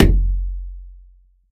WATERKICK FOLEY - HARM 02
Bass drum made of layering the sound of finger-punching the water in bathtub and the wall of the bathtub, enhanced with harmonic sub-bass.
bassdrum, foley, kick, percussion